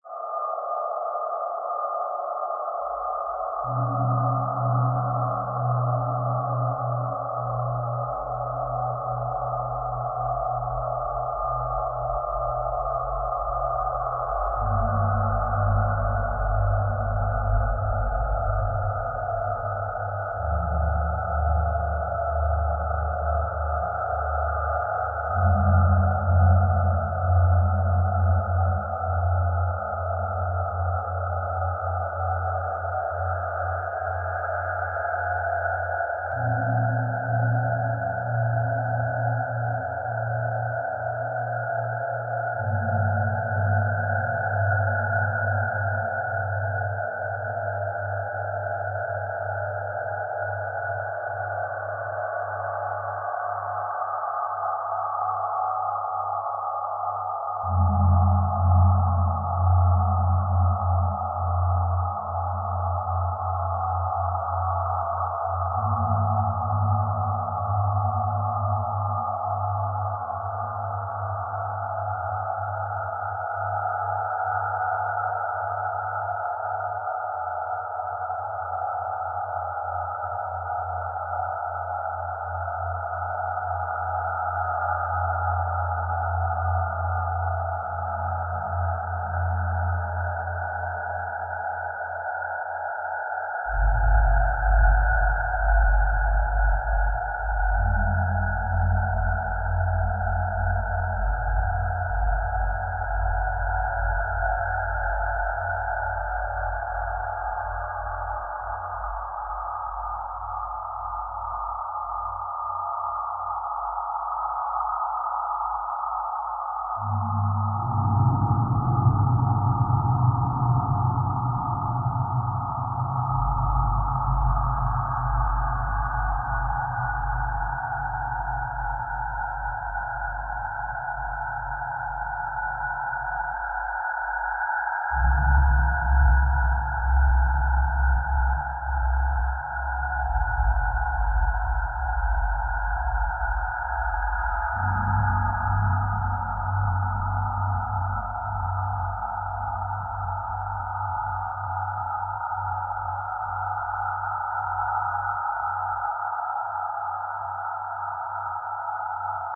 ShadowMaker - Crypt
I have been gone for a while, but the Shadow Maker has continued his dark and twisted work...
The crypt is filled with rotten things. Better not stay here for long.
So go ahead and use it in your projects! I am thrilled to hear from you if you can use it in something. I hope you find this atmospheric evil soundscape inspiring!
Made mostly in Audacity.